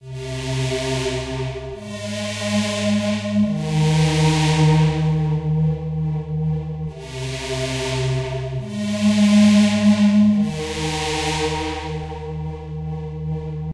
Bass-Middle
Re-sampled bassline with a LFO and reverb.
bassline, high, sample, pitch, reverb, LFO